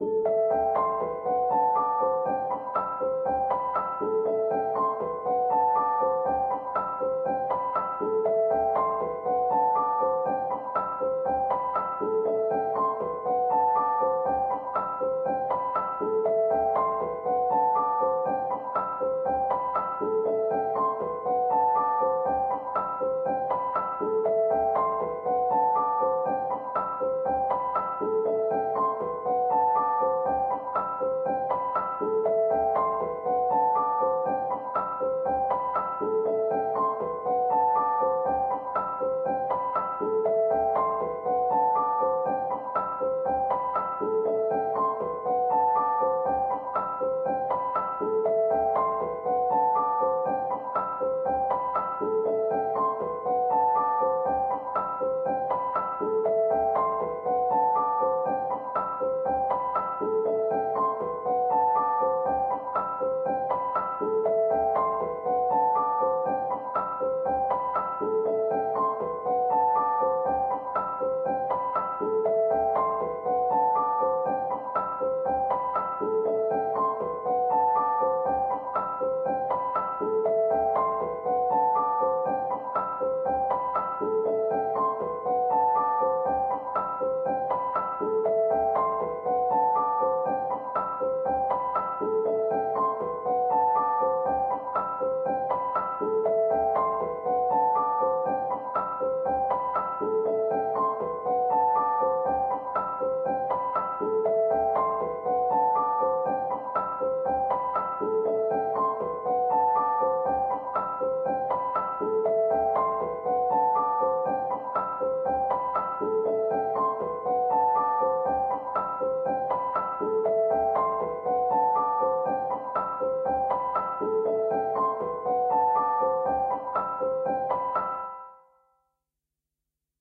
Piano loops 081 octave up long loop 120 bpm
simple, free, samples, bpm, 120bpm, music, Piano, loop, reverb, simplesamples, 120